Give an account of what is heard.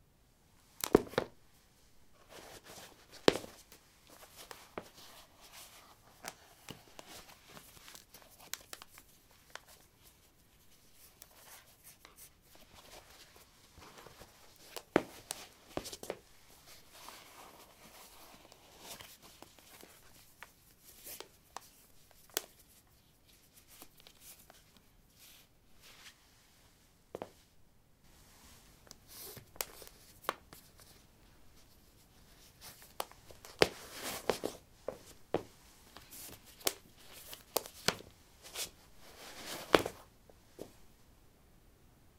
lino 10d startassneakers onoff
Putting low sneakers on/off on linoleum. Recorded with a ZOOM H2 in a basement of a house, normalized with Audacity.
footstep, footsteps